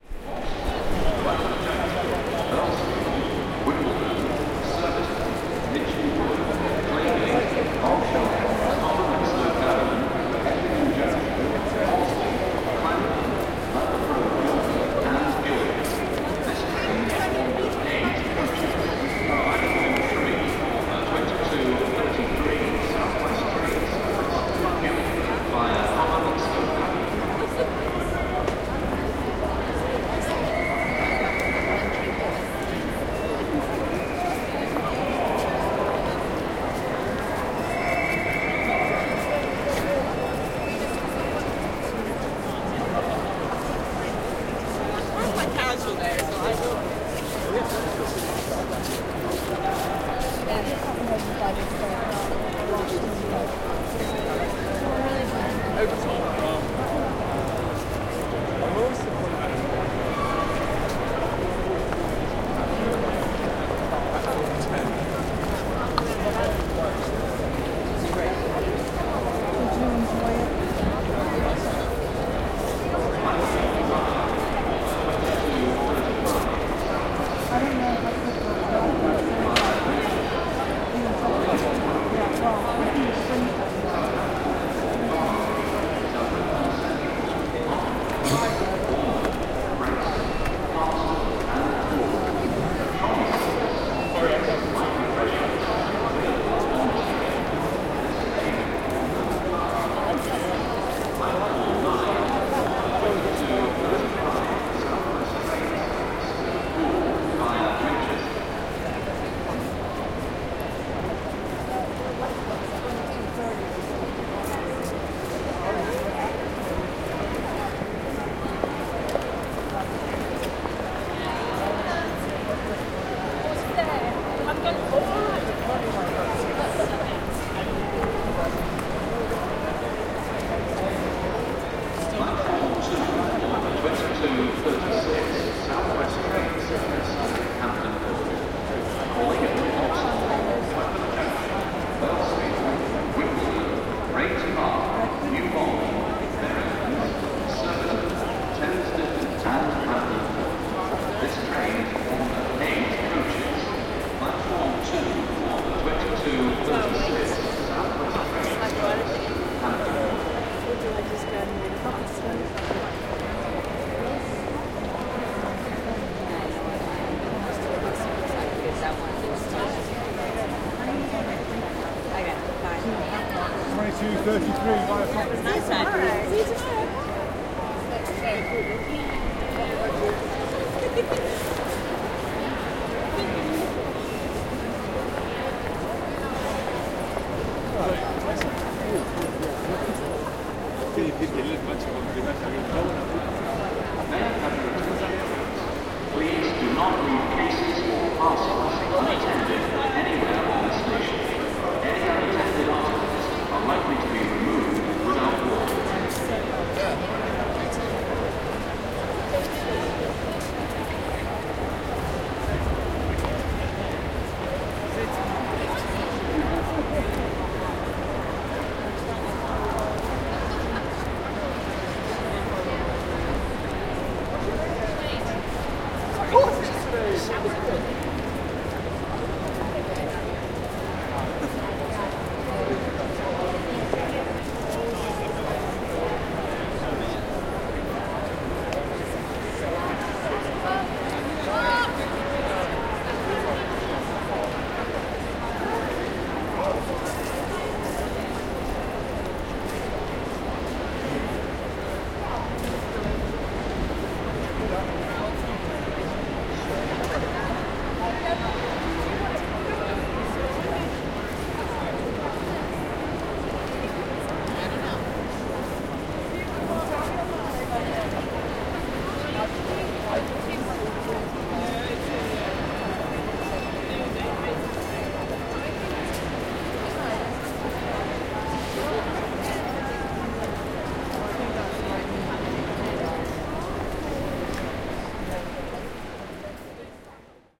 Ambience, London Waterloo Train Station
Just under 5 minutes of raw ambience taken at London Waterloo Train Station. You can hear various people walking past, a few statements from the transit announcements.
An example of how you might credit is by putting this in the description/credits:
The sound was recorded using a "H1 Zoom recorder" on 19th January 2017.